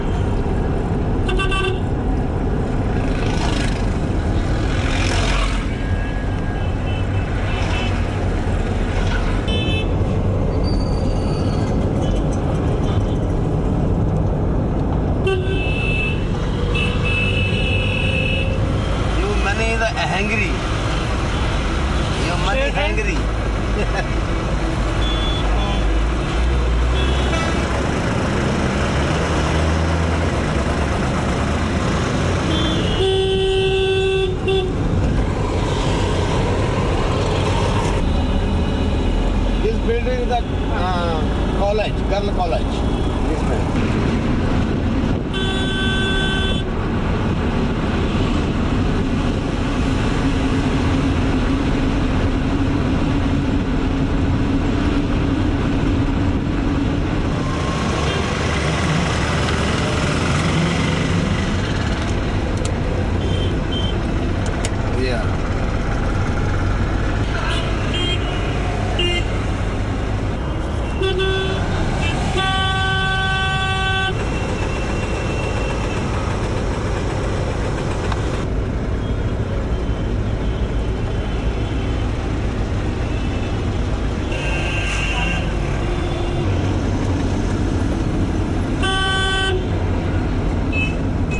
India Streets Inside Taxi At Highway (Traffic Jam, Honking, Beggars, TukTuks, Driver Talking)
India, inside a taxi at the highway. You hear honking, beggars, tuktuks, and the driver talking in the usual late evening traffic jam in the outskirts of New Delhi.